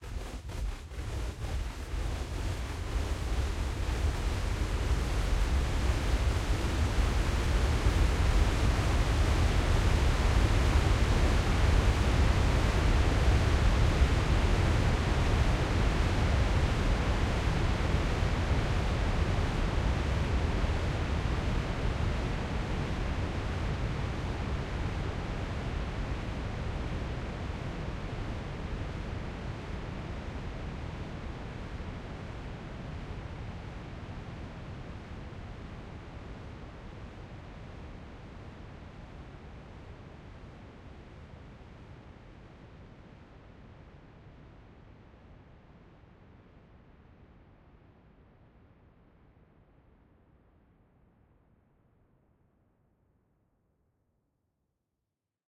Very long woosh fx with some kind of rain or water sound.